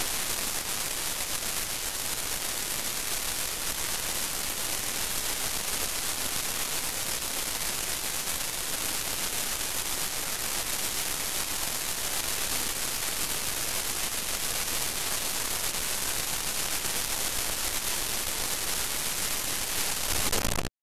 A product from one of my classes. Original sound was me shaking a tree branch. Recorded on my iPhone 8. Edited in Audition.